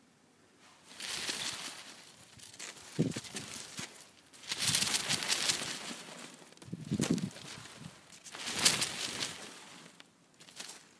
I simply moved around with the pinwheel and recorded it
pinwheel, Paper, wind